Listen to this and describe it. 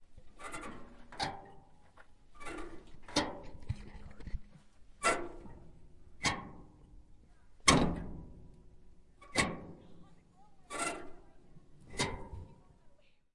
Old Truck Metal Squeaks-Creaks-Rattle-Impact Small Squeaks Close Press Release Tension
Found an old abandoned truck on a hike - recorded the squeaking and creaking of the doors opening and closing and stressing different parts of the metal. (It was done outdoors, so there may be some birds)
Metal, Squeak, Tension